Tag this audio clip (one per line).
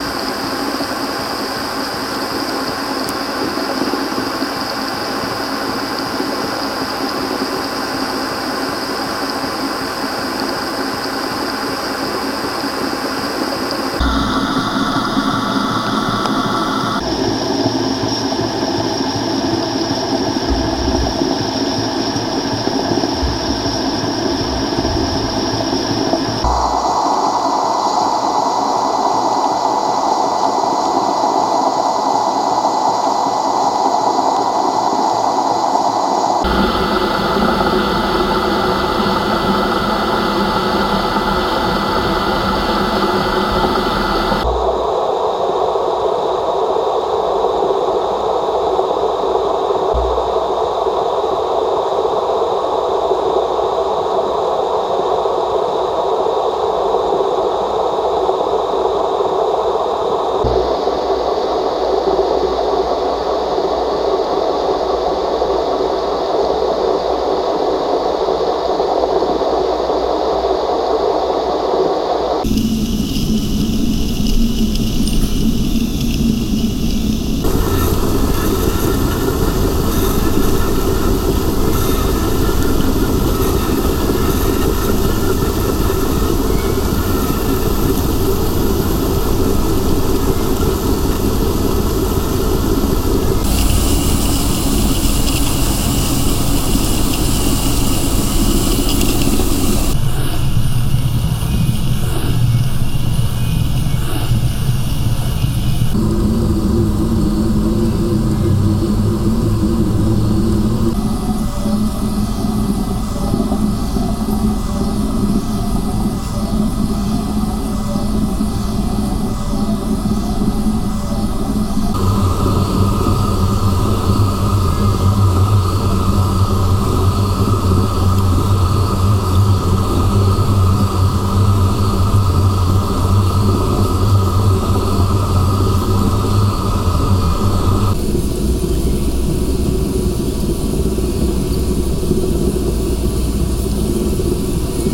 mechanical
transport